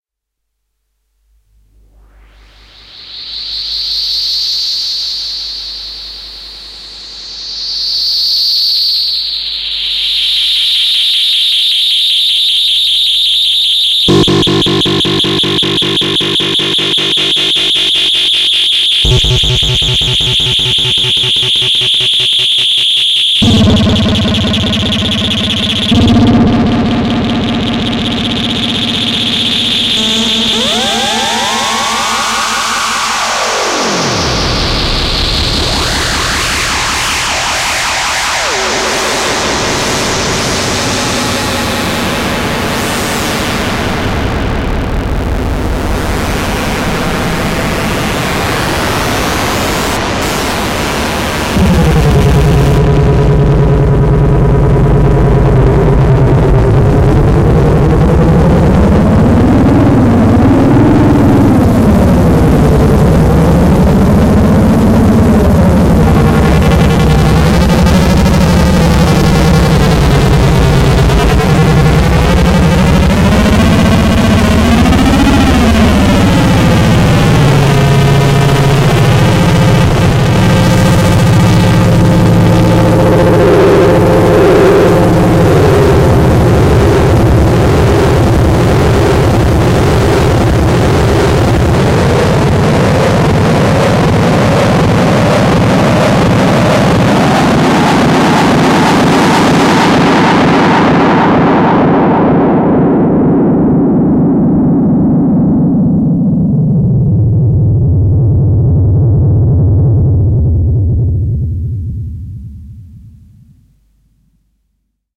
Crazy Audio 2
A strange and crazy sound. Created using Korg Monotron Duo and Delay. Recorded using Sony Sound Forge 10.